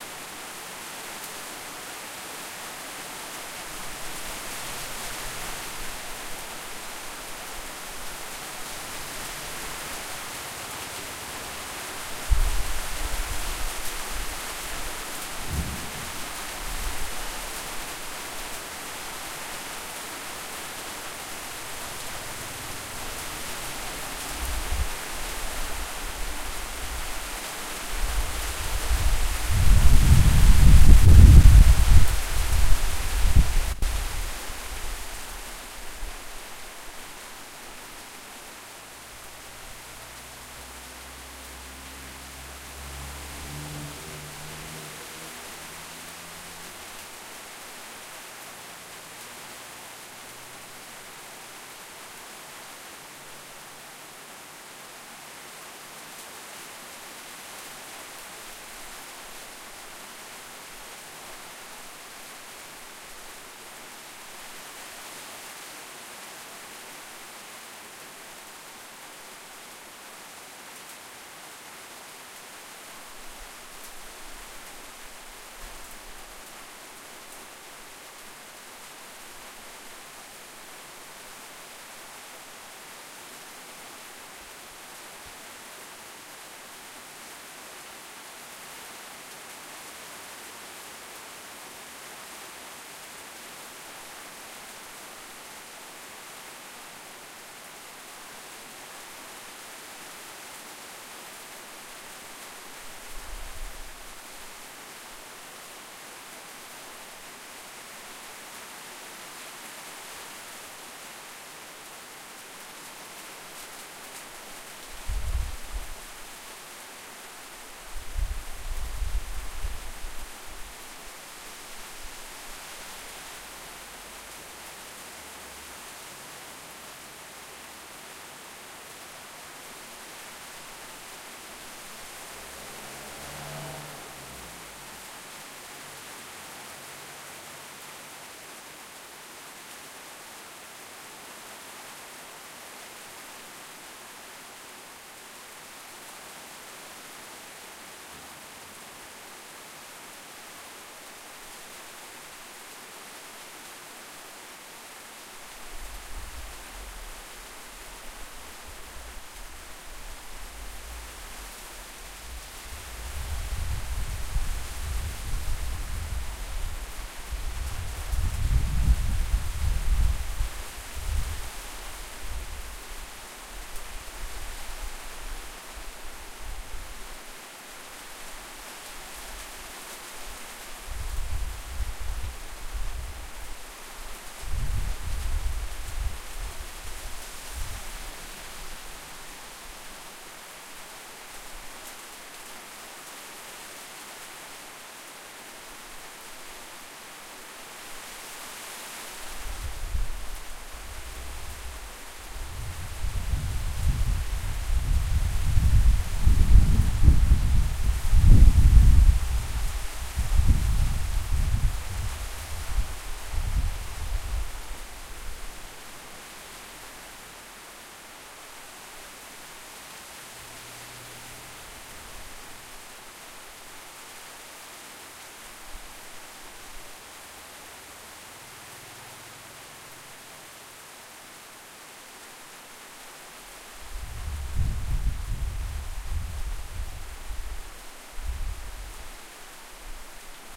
Rain in Kanchanaburi, Thailand
Rain and Storm in the streets of Kanchanaburi.
Recorded the 26/11/2013, at 3:30 pm.
nature, rain, rainstorm, storm, thunder, weather